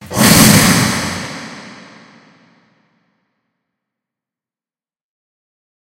basic reverby swoosh sound
magic, flame, disappearing